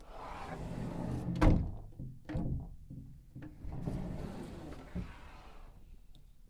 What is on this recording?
Sliding door 1
field, Patio, recording, Metal